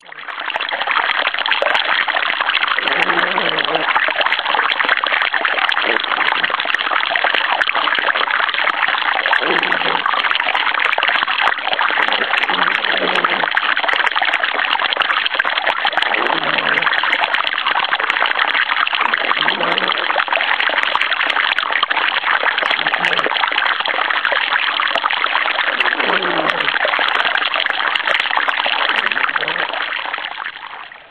aigüa montseny P8150187
It's the sound of a fountain in a Montseny camping near Barcelona.The low breath is a natural effect of the drain.Sound take with compact camera Olympus stylus 820
montseny, water